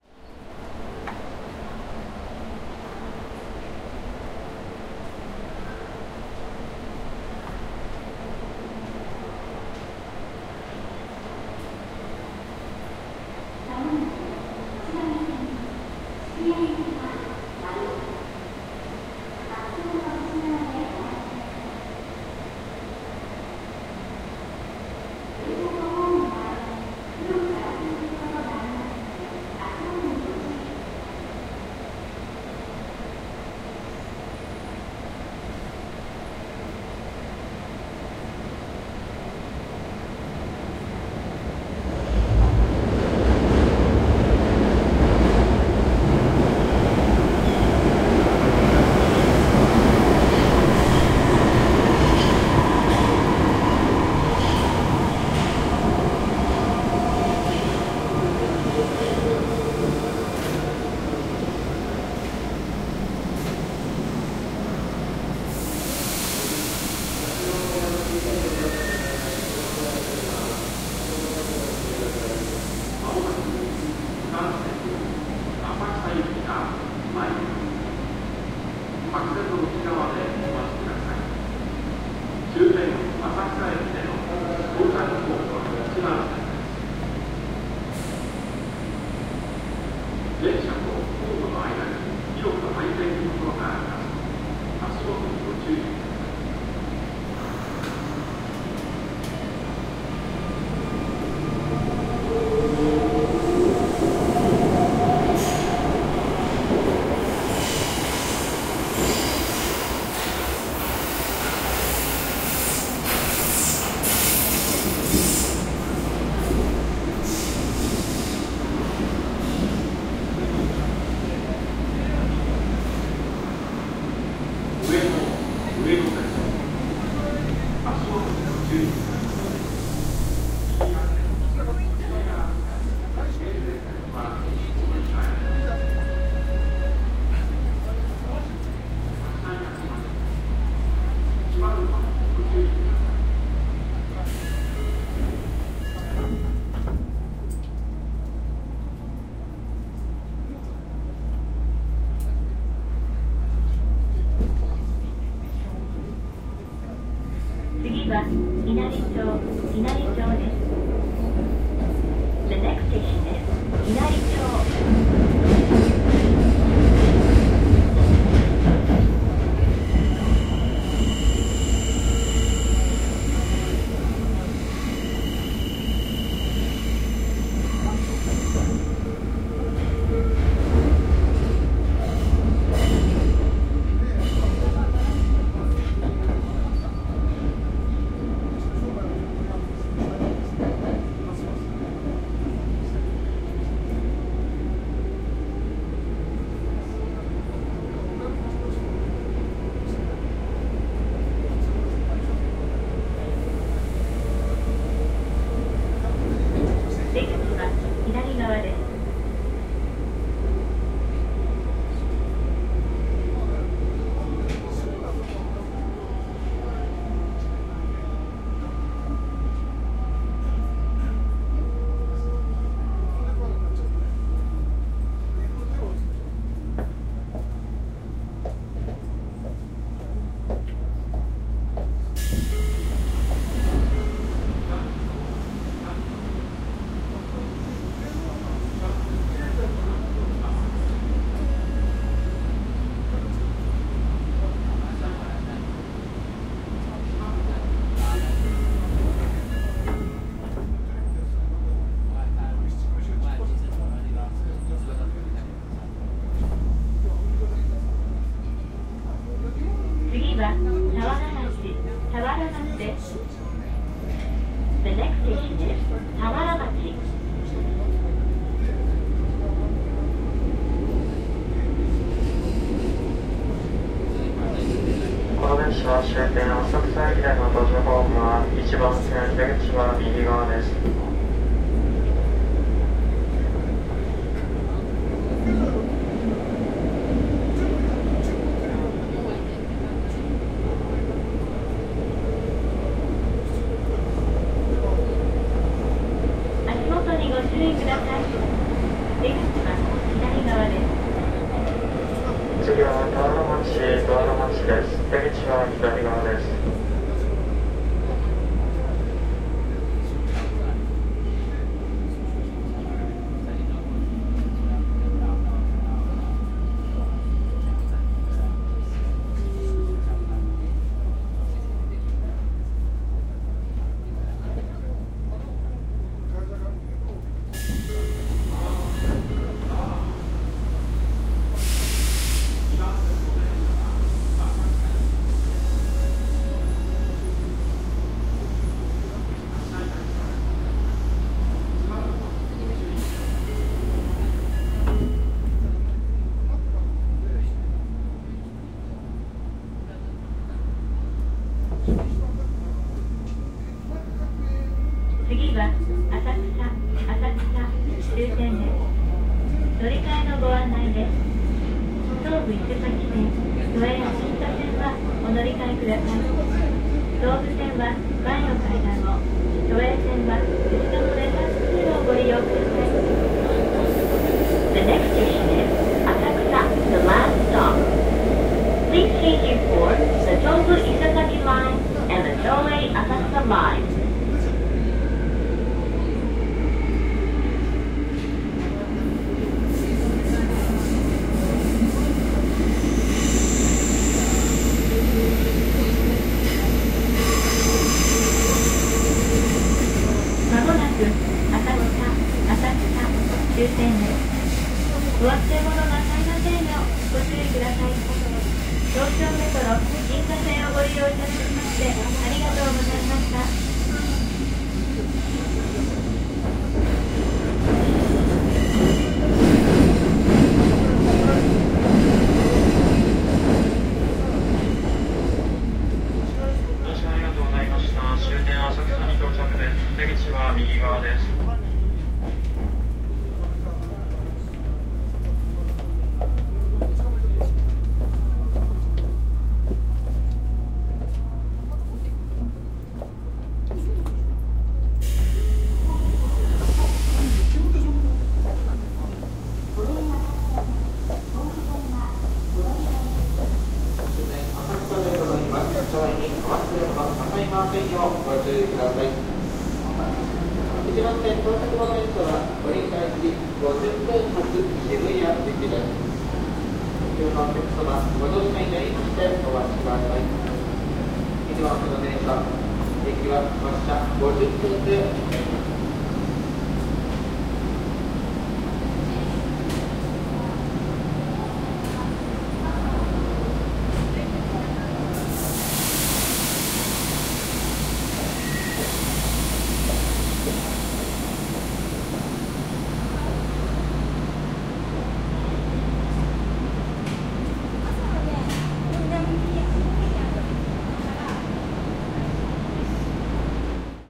subway ueno asakusa
On the Ginzo Subway Line in Tokyo, taking the train from Ueno to Asakusa (making two stops). Platform ambiance is before and after the train ride.
Recording made on 23 July 2009 with a Zoom H4 recorder. Light processing done with Peak.
station, shimbashi, subway, asia, japan, platform, tokyo, train